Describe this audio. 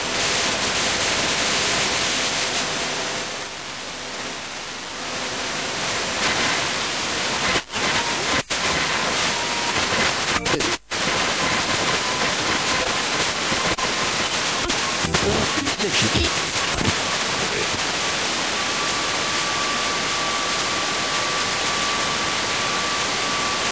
Rotating the tuning wheel of Panasonic RQ-A220 player/recorder/radio on August 18, 2015. FM mode.